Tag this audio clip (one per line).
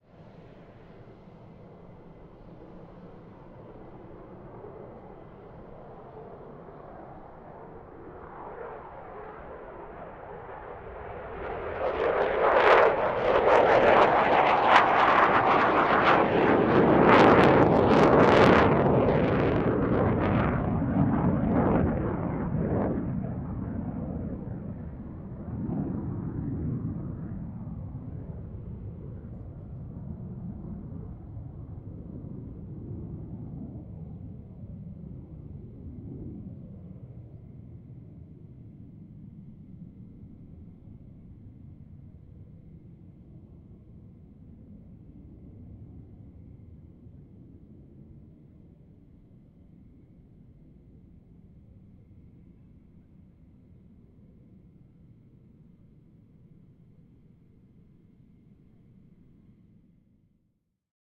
plane
aviation
military
fighter